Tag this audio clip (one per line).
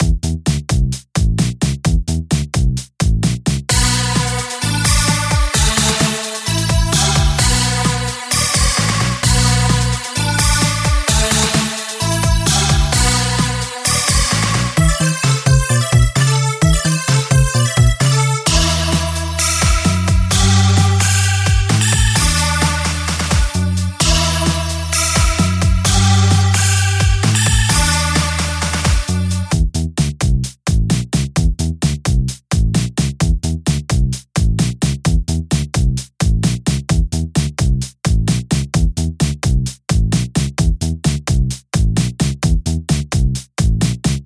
120
Acoustic
Backing
Bass
BPM
Drums
Electro
Free
Guitar
Loops
Music
Rhythm
Rock
Synthesizer